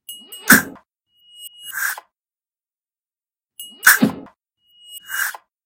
GIRARD Melissa 2020 2021 souffle
I create this sound with everyday objects ! It sounds mechanical and I love it.
Step by step :
- I recorded the sound of my inhaler
- I recorded a zip
- I duplicated it and slow it down
- I added the sound of a piece of metal against a mug
- I reversed all this sound
zip, pulling, pushing, draught, grind, industrial, metallic, zipper, robotic, acceleration, factory, push, mechanical